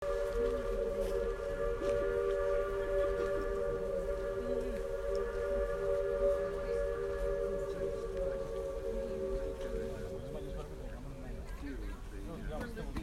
Steam train whistle in the distance.